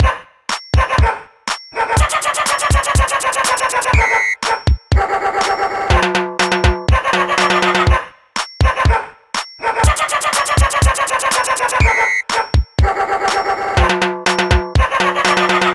Dog Melody (funny loop)
Dog barking melody with drums.
I did this with a midi sequencer application.
Tempo: 122 bpm
I hope you will enjoy it!
barking, drums, funny, silly, sound-effects